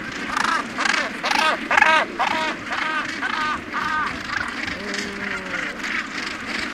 A Gannet cries as he approaches the nest. Recorded in the famous breeding colony at Bonaventure Island, Gaspé Peninsula, Quebec, using two Shure WL183 capsules, Fel preamplifier, and Edirol R09 recorder.
fou-de-bassan, alcatraz, birds, sula, nature, seabirds, jan-van-gent, field-recording, gannet, morus-bassanus, colony, basstolpel